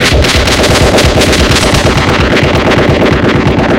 Ambient noise loops, sequenced with multiple loops and other sounds processed individually, then mixed down and sent to another round of processing. Try them with time stretching and pitch shifting.